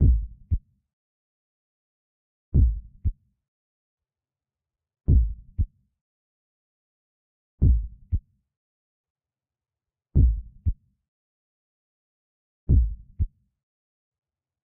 SLOW HEARTBEAT
An acidental heartbeat i made
anatomy, beat, blood, body, heart, heart-beat, heartbeat, human, pulse, pump, scare, slow, sound, stethoscope, thriller